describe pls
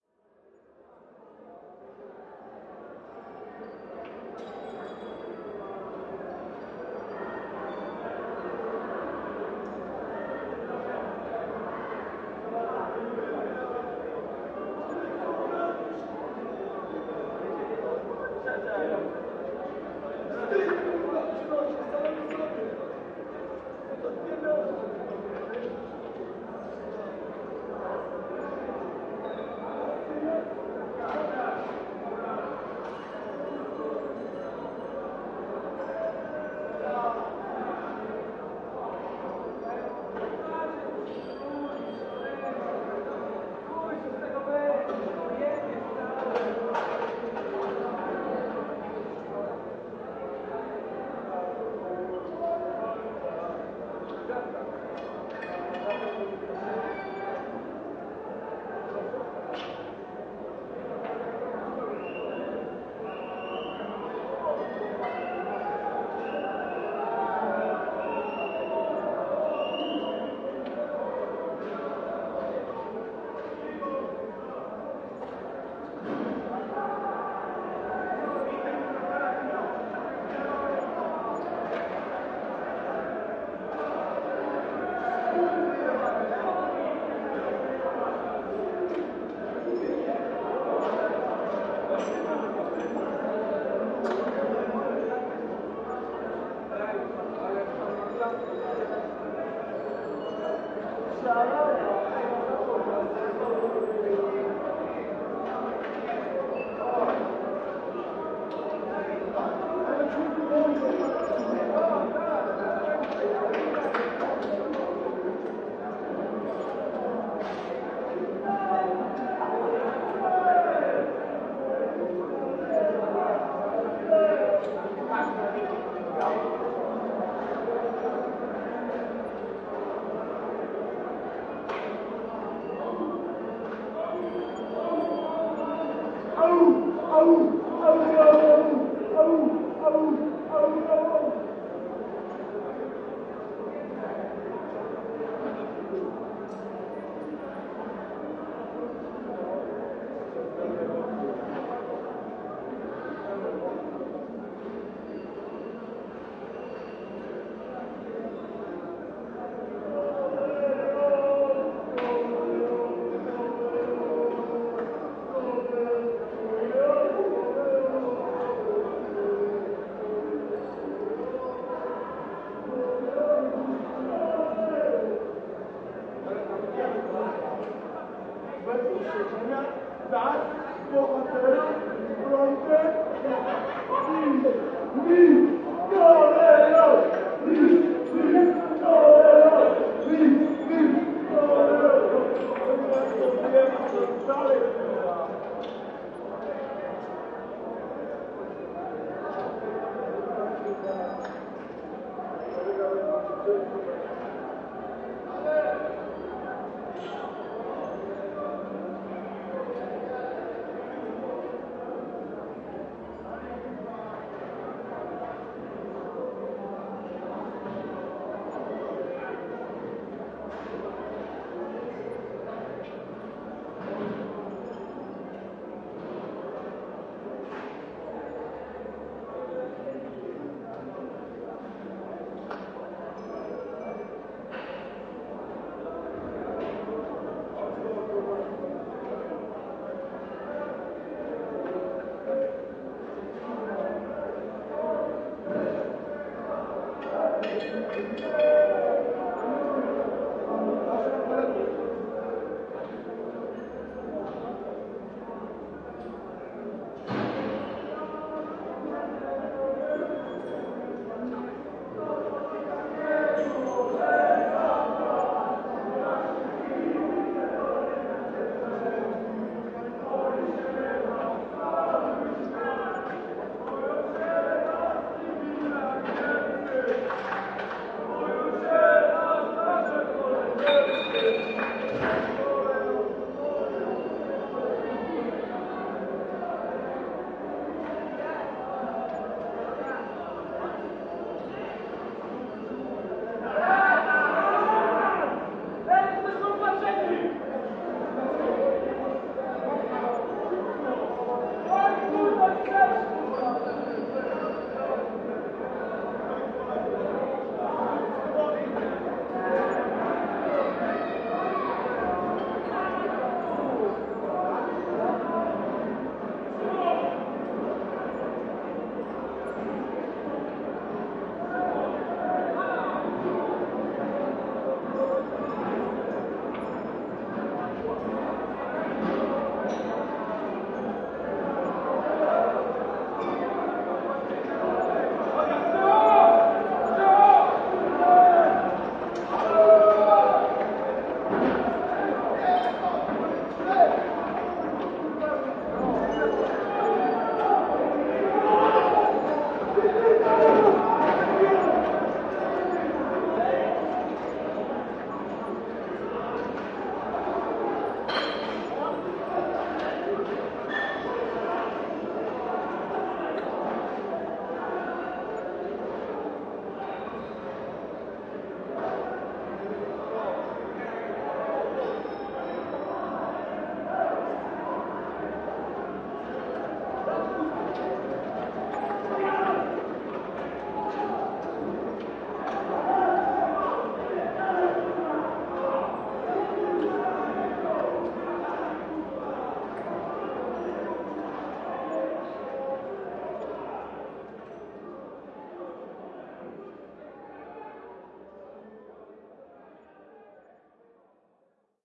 080815 lech poznan fans 002
08.08.2015: Around 1.30 a.m. on Wrocławska street in the center of Poznań. Street
roit after the final match of the local football team Lech-Poznań which
won Polish Championship.
pozna, roit, soccer, center, lech-pozna, football, fans